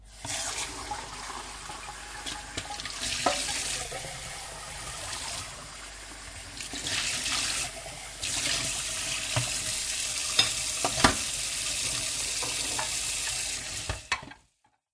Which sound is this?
Washing up 1
Sounds of a tap running, with dishes cutlery being swished around in a metal sink filled with water.
Recorded in March 2012 using an RN09 field recorder.
tap, running, Dishes, Water, Washing-up, sink, Washing, tap-running